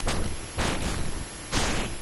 Either a spectrogram or spectrograph, afraid to google this far into describing for fear of computer crash, of someone saying compute.
image, space, spectrogram, spectrograph, speech, synth